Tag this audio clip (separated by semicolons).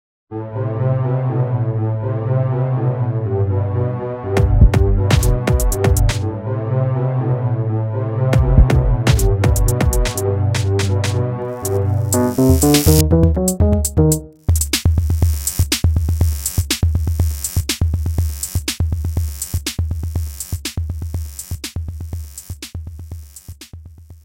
122-bpm; beat; drum; drum-loop; improvised; loop; percussion; rhythm; rhytmic